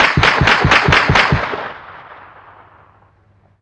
Humvee .50 caliber gun fired repeatedly.